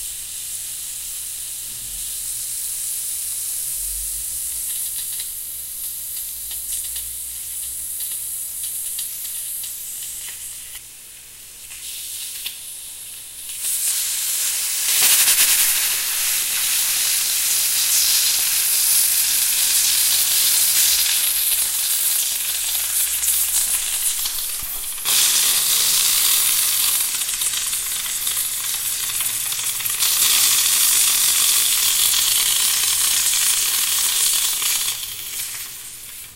PressureCooker Bubbles
Pressure cooker with hot air and bubbles of boiling water. Recorded with H4nsp recorder. Pressure variations and bubbles splashing.
pressure, cooker, bubbles